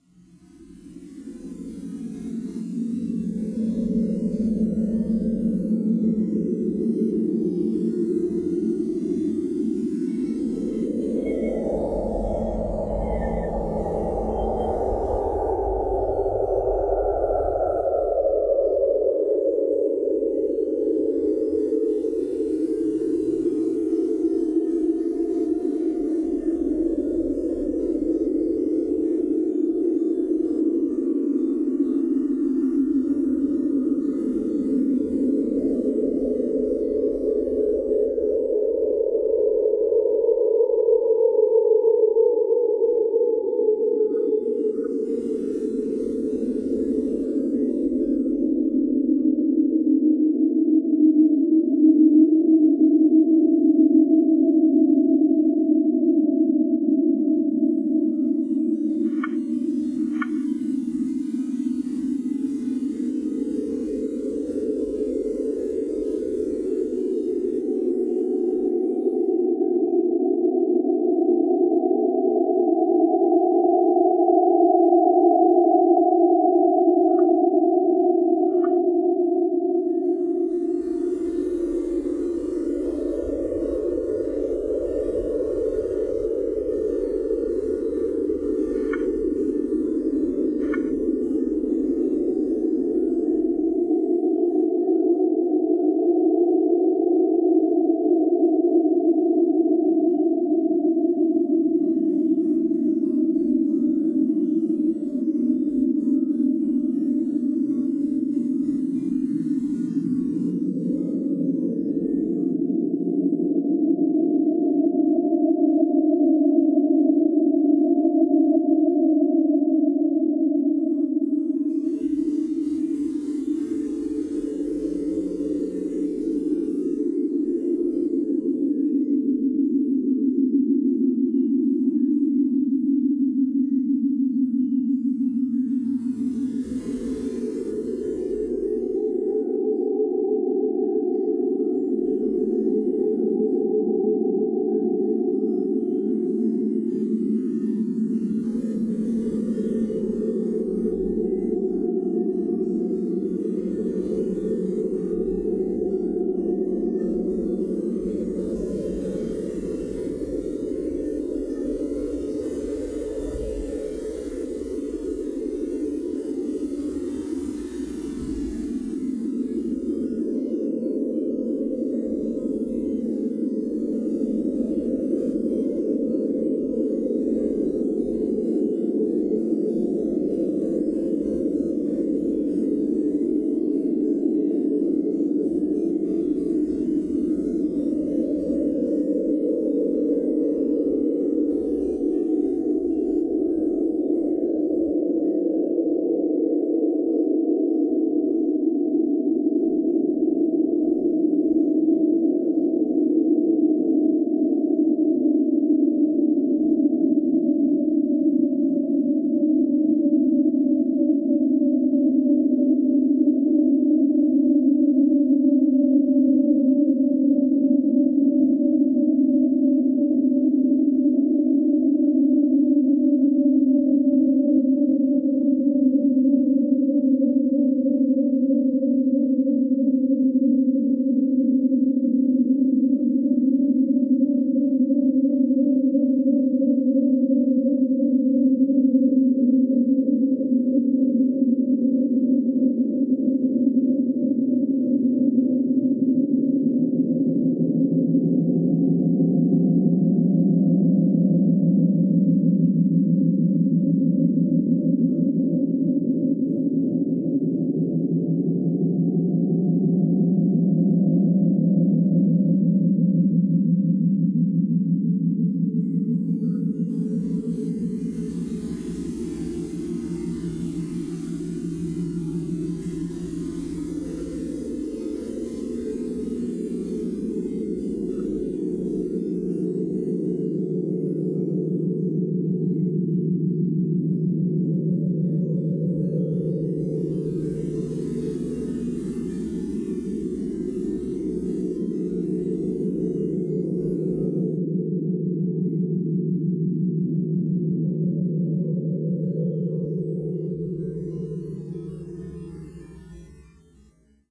Aeolius Harpman,The Ballad of is an edited section from a recording session January 2001. I recorded the sounds of several lengths of nylon sting stretched across 20 or so feet of open yard. This was recorded in the winter. A computer was set up to take a series of 10 minute samples every half hour.
So there you have it, the Ballad of Aeolius Harpman.
~ Enjoy